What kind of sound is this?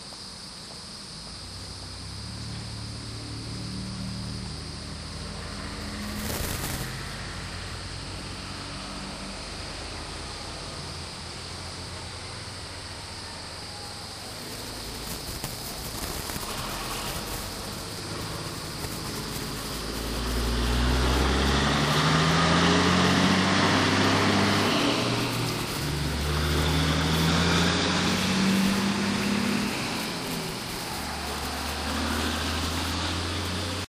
virginia exit41chevron
Exit 41 Chevron in Virginia recorded with DS-40 and edited in Wavosaur.
virginia, field-recording